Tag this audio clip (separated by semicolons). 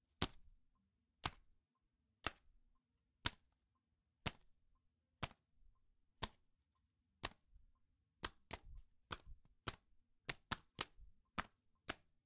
Domestic; Fork; FX; Hit; Hits; Kitchen; Knife; Loop; Metal; Metallic; Pan; Percussion; Saucepan; Spoon; Wood